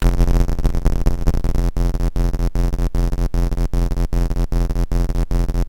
BentphoneNoFX46 IBSP1
This samplepack contains 123 samples recorded from a Cicuit Bent Turkish Toyphone.
It has three subfolders containing a) sounds from the Toyphone before bending, (including the numbers from 0-9 in Turkish), b) unprocessed Circuit Bent sounds and c) a selection of sounds created with the Toyphone and a Kaoss Pad quad.